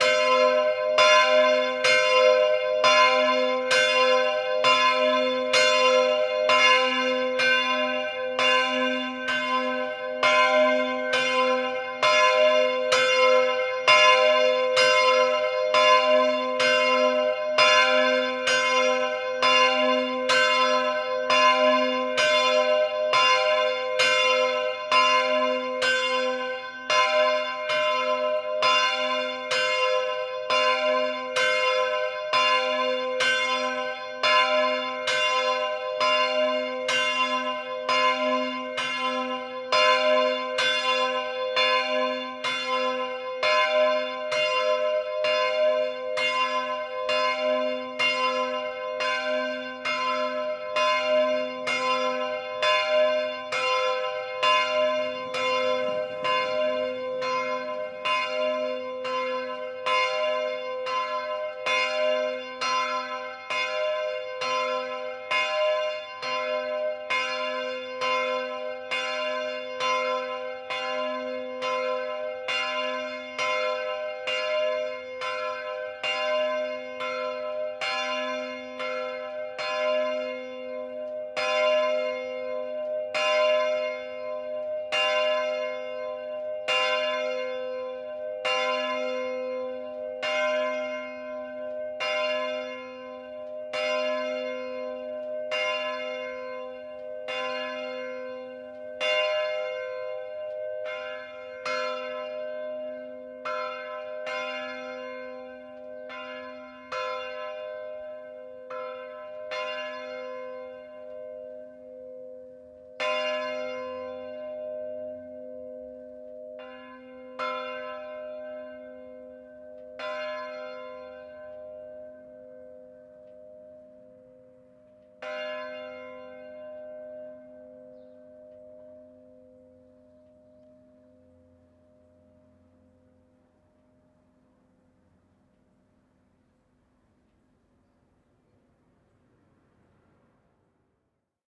church bell 1
Mono recording of church bell in Lumbarda, Croatia. Recorded with DPA-4017 -> SQN-IVs -> Edirol R-44.